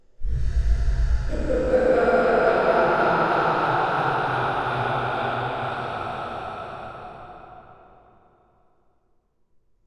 Manic laughter for all your horror needs!